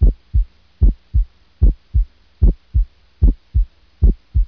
Cardiac and Pulmonary Sounds - Primo tono normale
sounds for medical studies